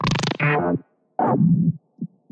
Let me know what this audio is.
glicz 0007 1-Audio-Bunt 8
NoizDumpster, VST, breakcore, bunt, digital, drill, electronic, glitch, harsh, lesson, lo-fi, noise, rekombinacje, square-wave, synth-percussion, synthesized, tracker